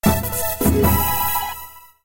Perfect sounds for QUIZ shows!
quiz, tone, winner